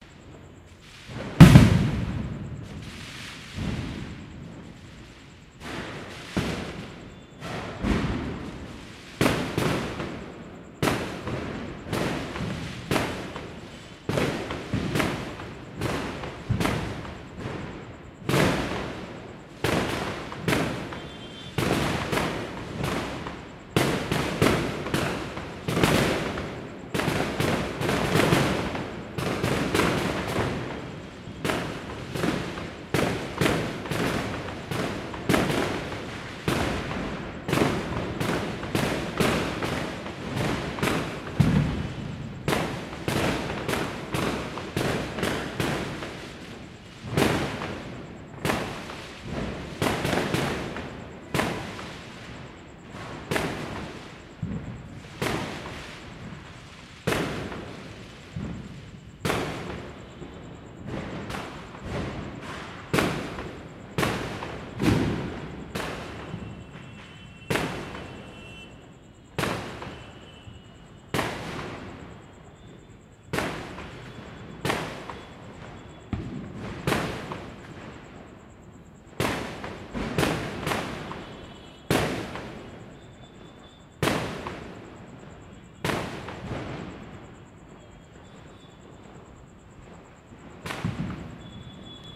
ITs a sample of people celebrating Diwali but bursting crackers, but it can get away as a GunShot sound....Recorded using a Zoom H4N.